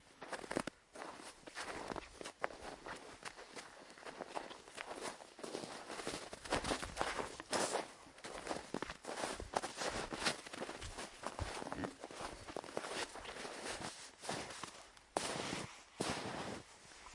Recorded in Kékestető (Hungary) with a Zoom H1.